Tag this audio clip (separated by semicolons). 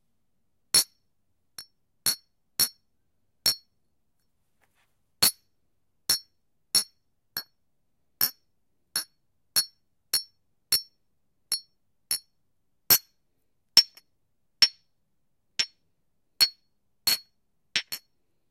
clinking; tink; glass; toast; shot-glass; clink; bottle